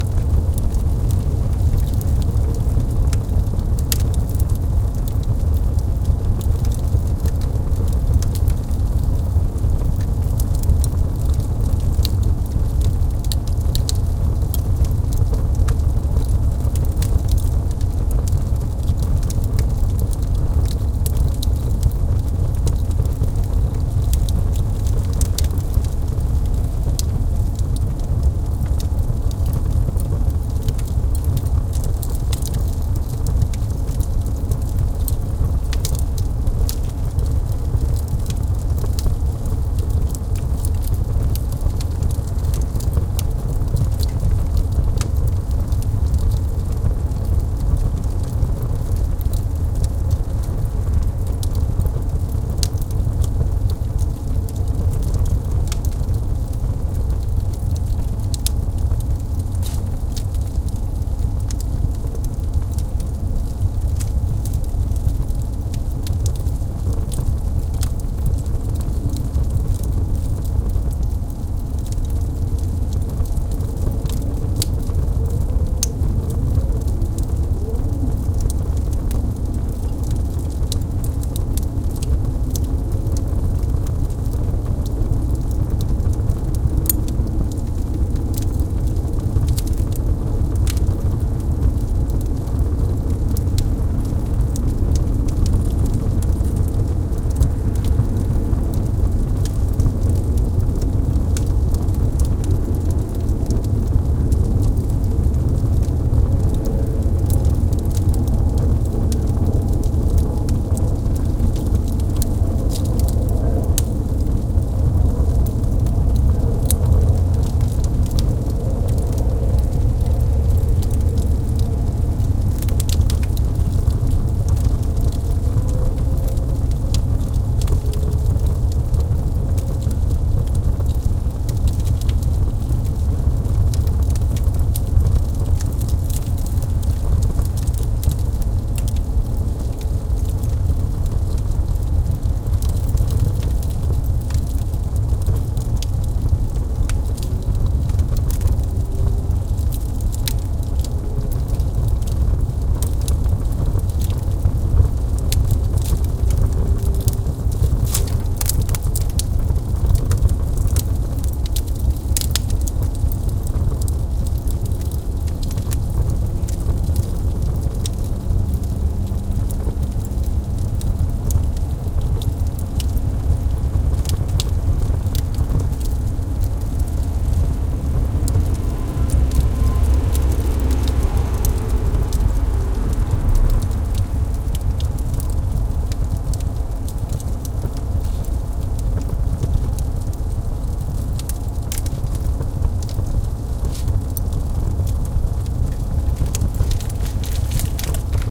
Outdoor fireplace with a fire burning. Recorded with Sony PCM-M10 on tripod (no windscreen), placed on the ground about 1-2 feet directly in front of the fire. Includes some city background noise such as car passing by near the end.